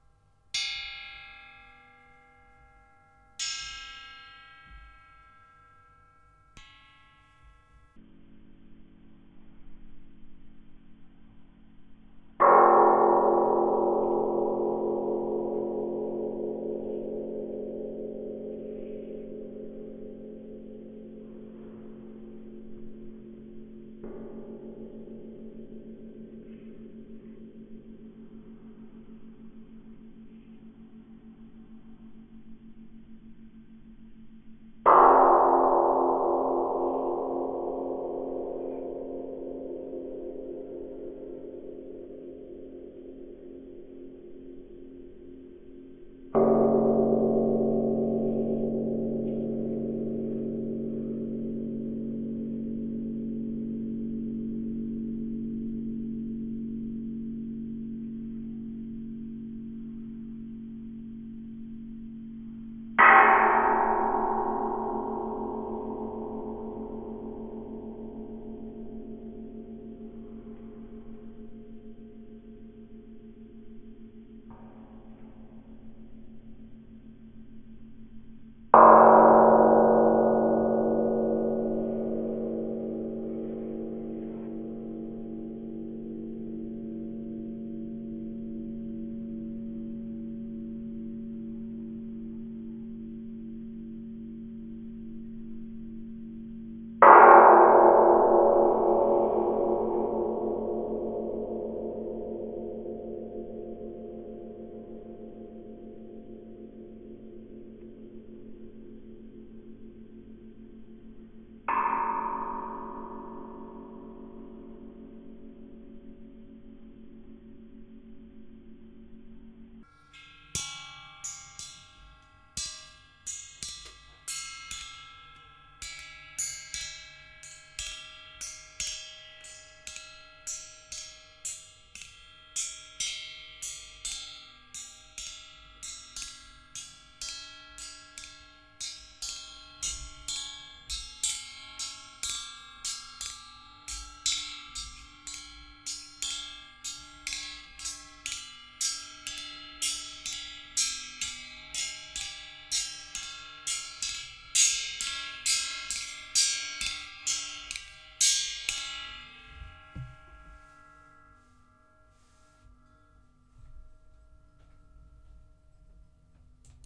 I've Always been fasscinated of vibrations, from Earth quakes to tiny rubber rings. I have been studying latex vibrating. I found a reort on latex as long ago as to a German University Year 1895! You'll get examples later. The beautifl singing steel bar was for book shelves. All my sources are from Daily things we all have around. By striking it on varying positions you got small Changes despte it was one and the same body.
construction, part, steel, vibrations